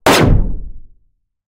Light Blaster Weapon
Rikochet, Machine, SciFi, Rifle, Bang, Pew, Shoot, Heavy, Light, Shot, Fire, Pulse, Blaster, Loud, Gunshot, Laser, Gun, videgame